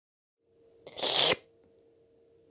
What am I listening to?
A quick over the top licking noise